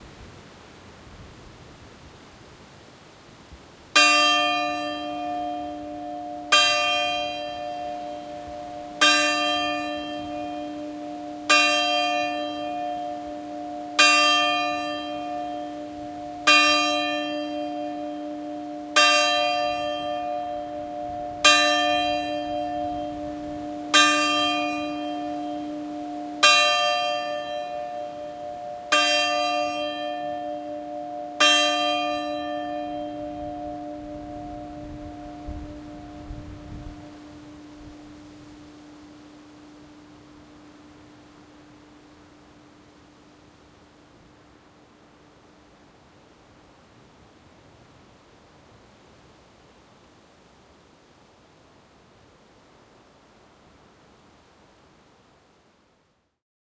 Taken in front of the church on Ilovik, a small Croatian island. It was a windy night, so you can hear wind noise, despite a dead cat.